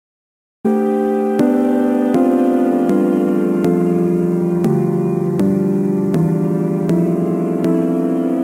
IDM synth, delicate Boards of Canada-like synth.
boards, synth, idm